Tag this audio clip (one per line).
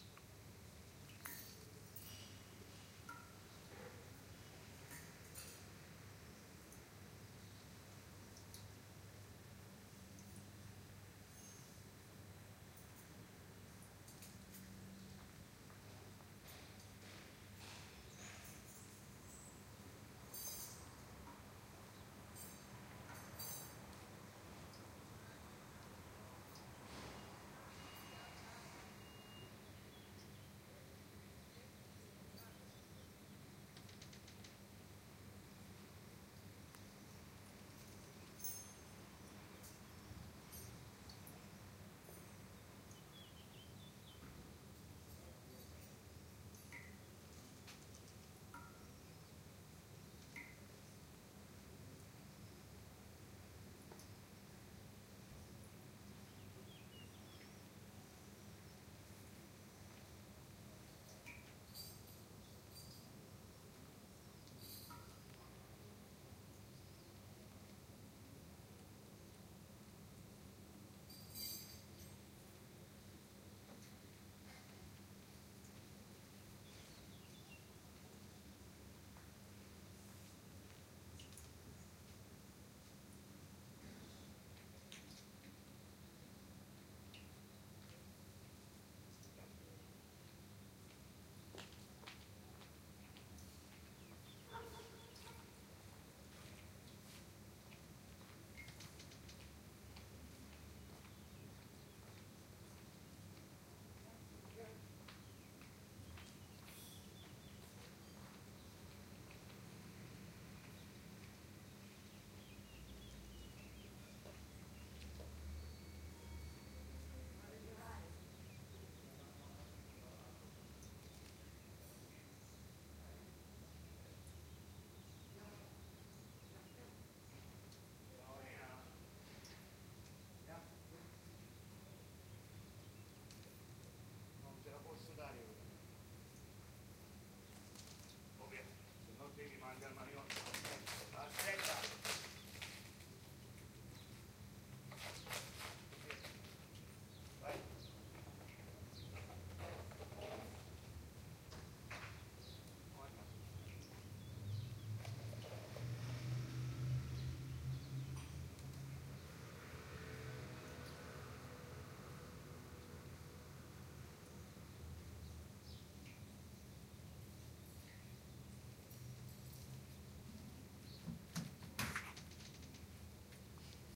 recording
field
Binaural
urban
quiet